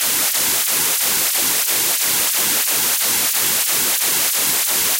ind white noise flange
Independent channel stereo white noise created with Cool Edit 96. Flanger effect applied.
flanger, stereo, white, noise